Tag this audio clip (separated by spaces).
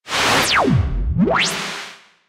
fx high pitch reverse sound synth Woosh